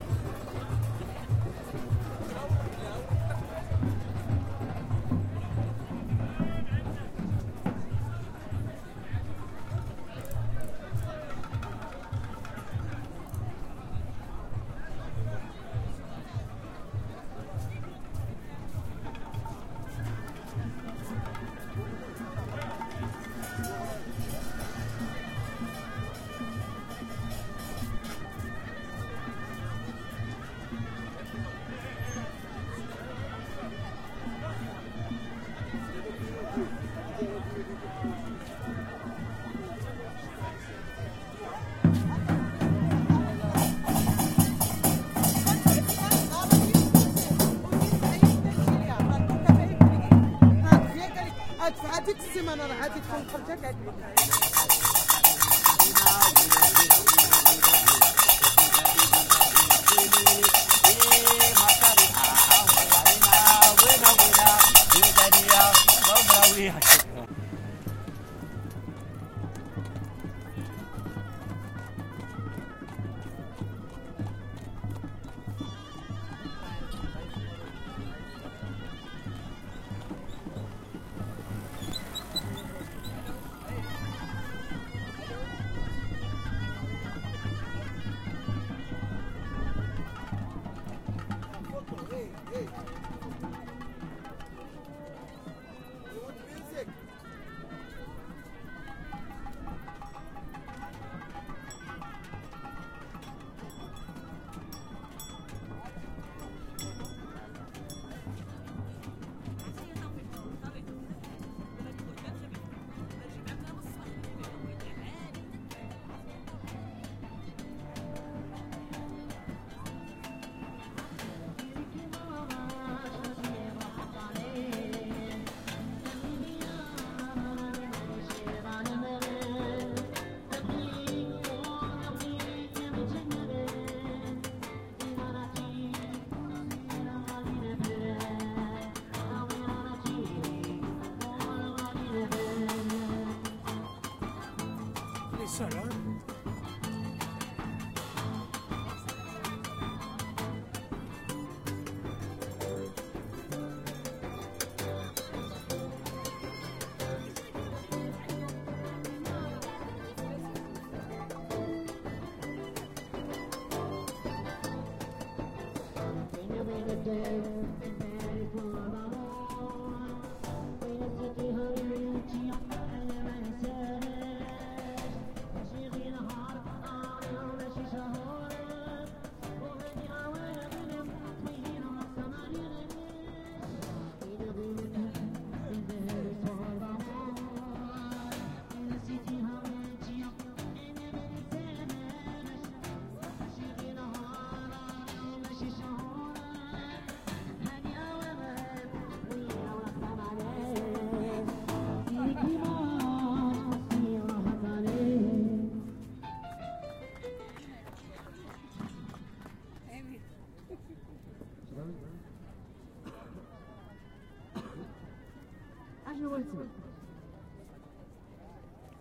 Jemaa el-Fnaa is one of the biggest squares in Africa, and is one of the most vibrant places I have ever visited. Each time of day brings a very different energy, and one will find snake charmers, musicians, dancers and stands selling pretty anything you could think of. Although busy during the day, Jemaa el-Fna is swarmed with people at night and can quickly become overwhelming. This recording is from February, 2020, using a Tascam DR-05X.